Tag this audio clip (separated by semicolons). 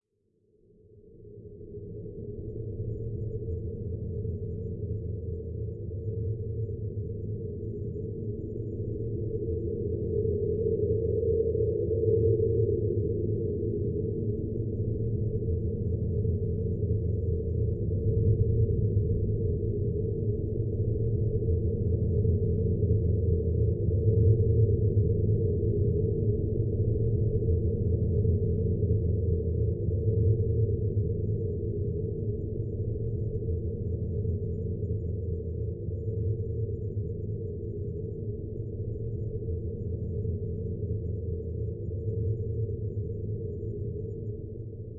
background; cold; wind